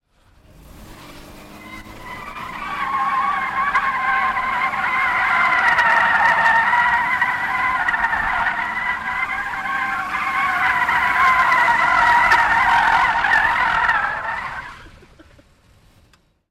Chrysler LHS tire squeal 04 (04-25-2009)
4 of 4. Sound of the tires squealing as I drive my car round the microphone a couple of times. Some distortion is present in the recording. Car is a 1996 3.5L V6 Chrysler LHS. Recorded with a Rode NTG2 into a Zoom H4.
car, screech, skid, speed, squeal, tire, tyre